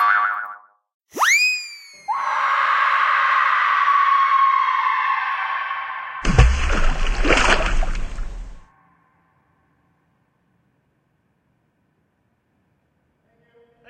boing scream splash
made this for a sound track for home movie with grand kids
made, up